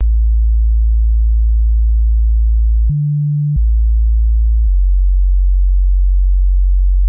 Remix parts from My Style on Noodles Recordings.